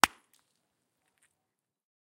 Crushing a Lemon 1 3
Bone, Crushing, Design, Fruit, Knife, Lemon, Wet